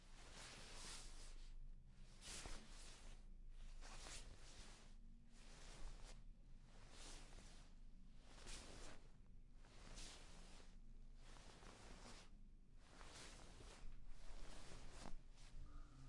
Es el sonar de una cobija cuando se va acostar
bedspread, blanket